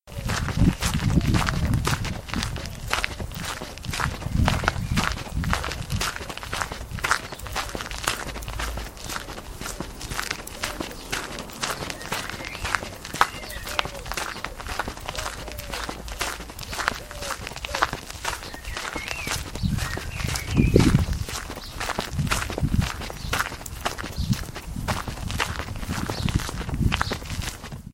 Footsteps, Gravel, A
Raw audio of footsteps on a pebbled gravel path with dirt, with some background ambience and occasional passing wind.
An example of how you might credit is by putting this in the description/credits: